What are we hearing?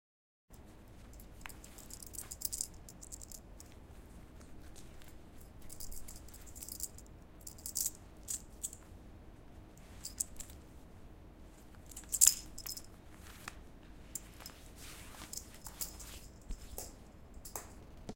Recorded using a Zoom H6. Sound made by putting on and removing a belt from a pair of pants.